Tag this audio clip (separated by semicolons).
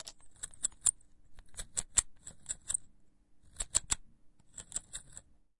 close-up; crisp; metallic; pepper-grinder; present; squeaky; stereo